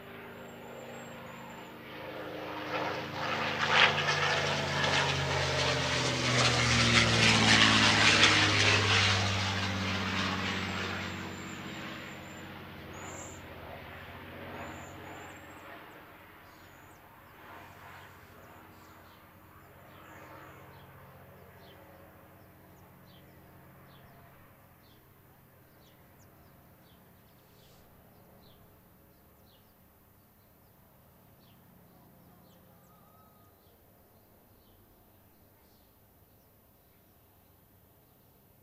Just some backyard sounds with airplane flying overhead, as heard from my deck outside.
aeroplane, aircraft, airplane, ambient, backyard, bird, birds, chirping, environment, flight, jet, outdoor, outdoors, plane